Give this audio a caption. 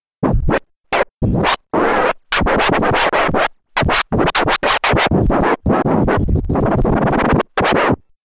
These samples made with AnalogX Scratch freeware.